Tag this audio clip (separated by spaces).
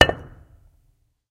concrete impact stone strike